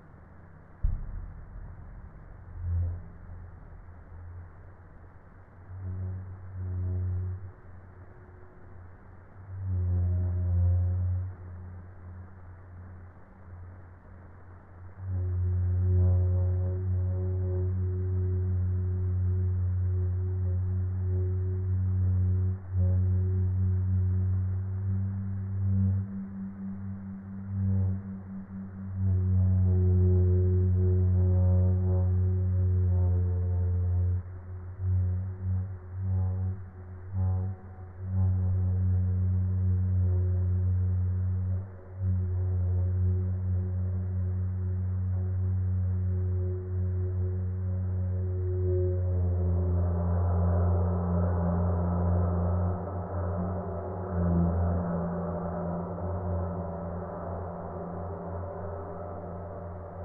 cymbal lo02
A few very strange tracks, from a down-pitched cymbal.